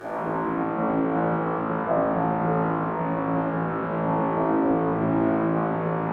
A note drone flanged.
chorus, drone, flange, flanger, low-A, synth, synthesizer